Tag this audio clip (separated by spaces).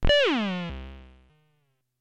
analog down fall fx monotribe percussion